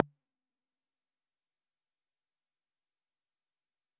Tonic Crack
This is a crack sample. It was created using the electronic VST instrument Micro Tonic from Sonic Charge. Ideal for constructing electronic drumloops...
electronic, drum